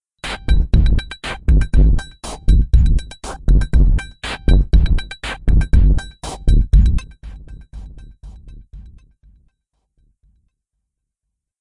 FM8 sampled with Audacity - 16 bit